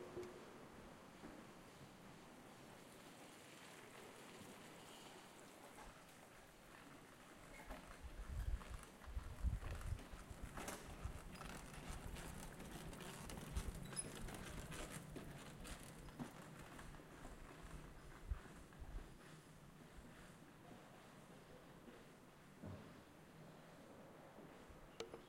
Berlin bicycle passing - slightly creaking sound, street ambience. Zoom H4n. Stereo.
city, street, field-recording